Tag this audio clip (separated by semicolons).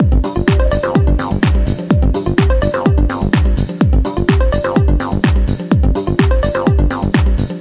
smooth; flow; loop